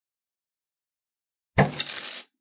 effecto de caida
effect, fx, sound